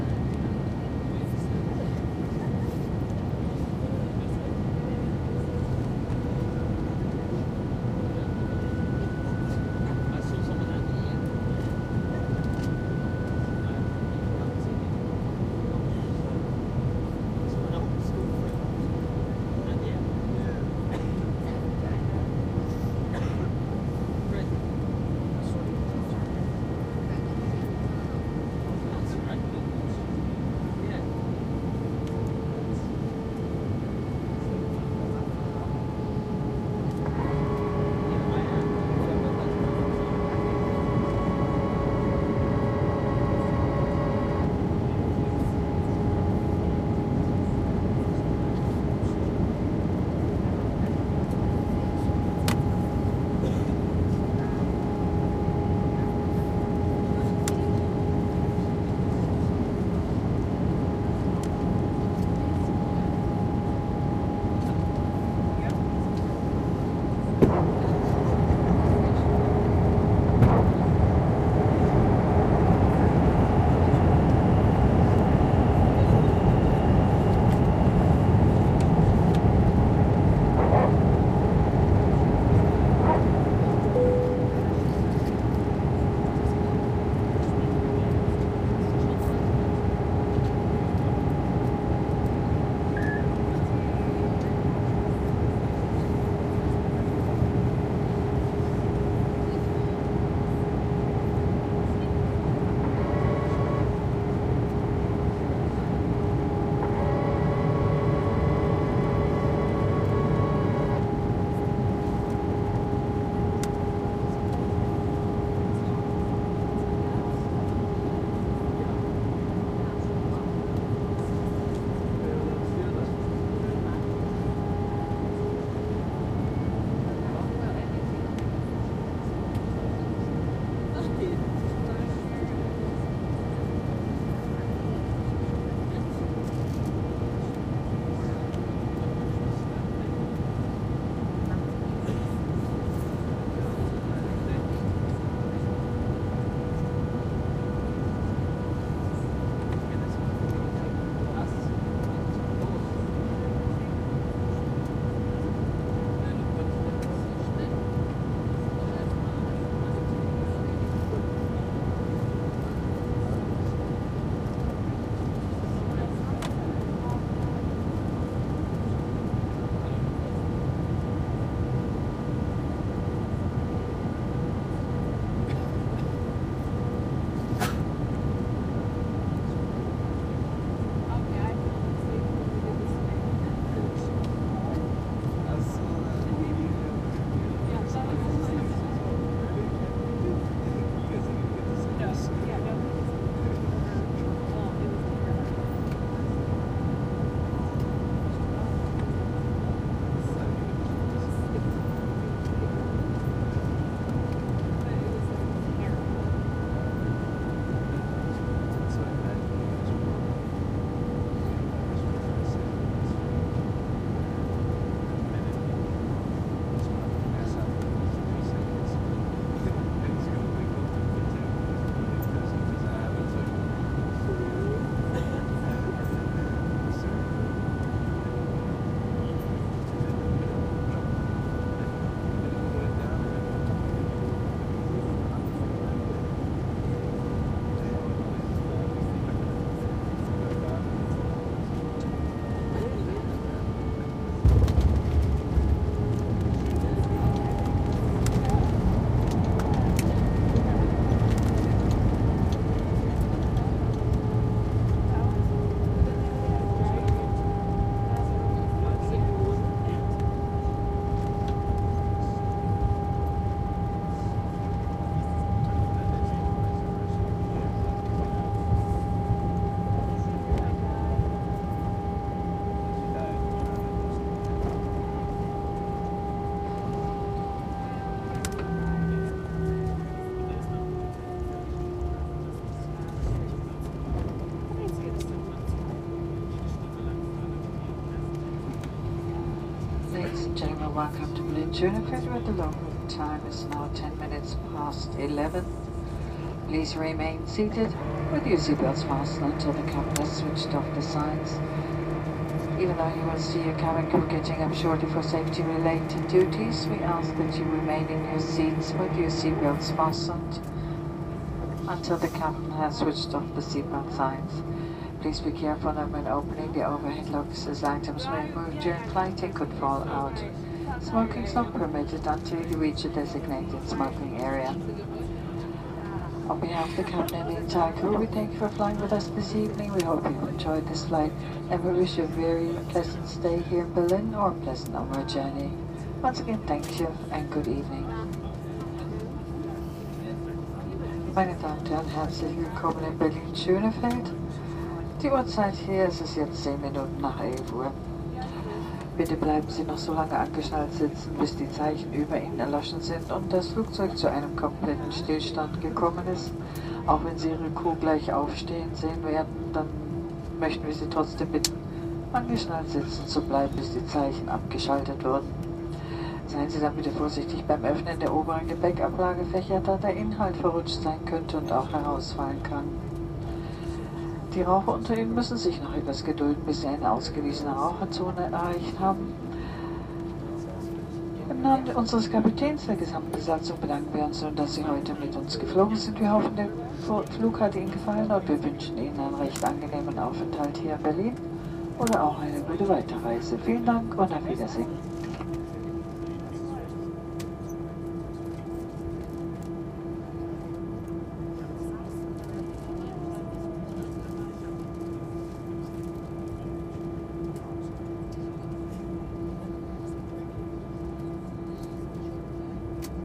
140802 SXF PlaneLandingInt
Stereo field recording of the interior of an Airbus A330 during landing at Berlin Schönefeld on a flight from London Gatwick. The recorder is a Zoom H2 located in the stowage net of the right side aisle seat two rows behind the wing exits. Prominent engine whine and hydraulic noises, A group of british youths can be heard softly chatting in the midrange. At 37 seconds, the deceleration flaps come out, the hydraulic action can be clearly heard. At 1:09 the landing gear is engaged, and at 1:50 the flaps fold out to landing position. The plane touches down on the runway at 4:05 and at 4:52 the crew chief does the usual welcome thing via intercom first in English, then in German.
Recorded with a Zoom H2, mic's set to 90° dispersion.
engine, ambience, interior, touchdown, airplane, soft, machine, voices, intercom, landing, field-recording, noise, hydraulic